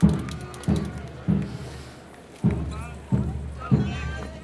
Marching band drums in a parade.Lots of crowd noise.